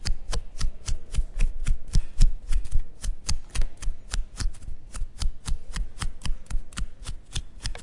mySound SASP 27
Sounds from objects that are beloved to the participant pupils at the Santa Anna school, Barcelona.
The source of the sounds has to be guessed, enjoy.
santa-anna, spain, cityrings